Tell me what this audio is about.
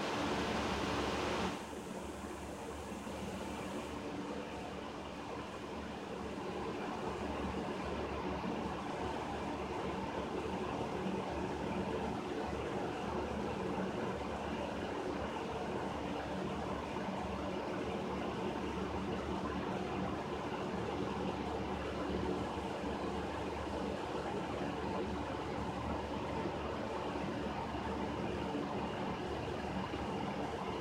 Ruido blanco de un ventilador
White noise made by a fan
fan, white-noise, ventilador, ruido-blanco